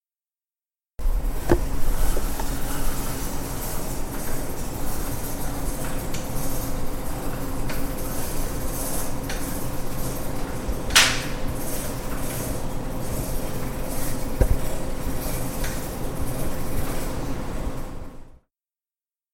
Sound produced when the printer does not print, the printer is in sleep mode. This sound was recorded in the library of UPF in a silent environment and the recorder was near to the source.
campus-upf, mode, printer, sleep, UPF-CS13